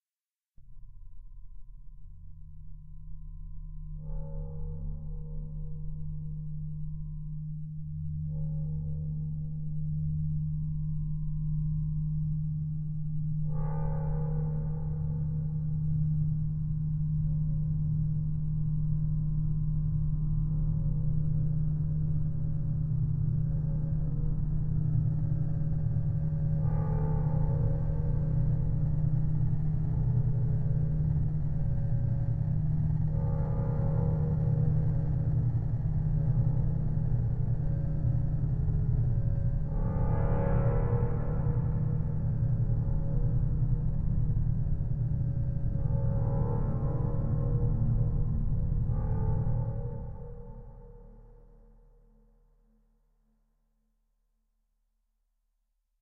A short simple waft soundscape. Dark and evil.